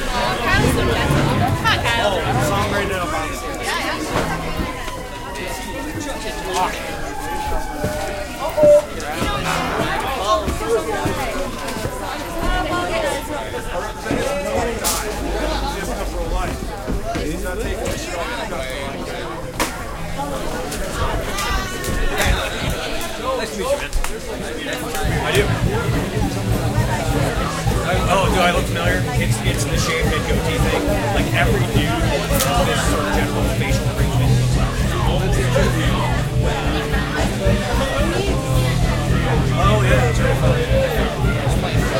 crowd ext medium backyard punk party in crowd1
backyard, crowd, ext, medium, party, punk